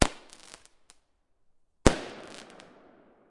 Setting off a small mortar type firework with sparks
crackle, explosion, field-recording, firework, mortar, pop, sparks, stereo